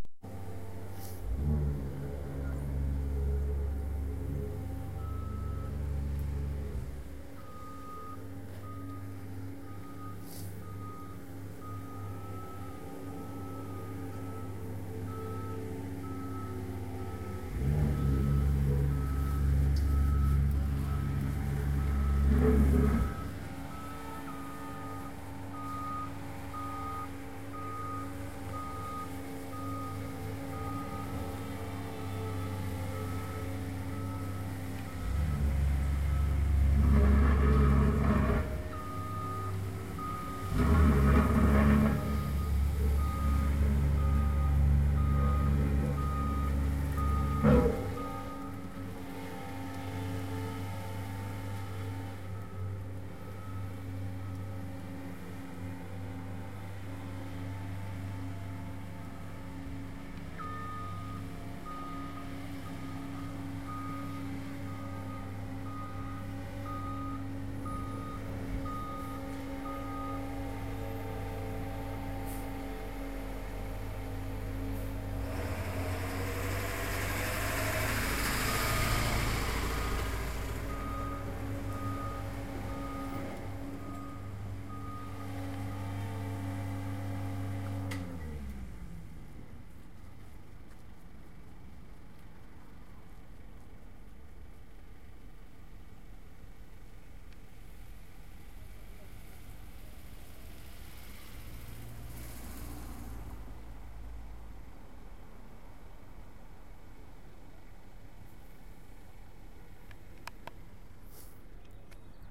Construction. Continuous engine Small paving roller, low growl, roar. Traffic. Recorded with iPod, Belkin TuneTalk Stereo; no audio compression. Delivery truck passes, 1:20. Engine idle down 1:28. Moderate traffic, cars passing, esp. from 1:38.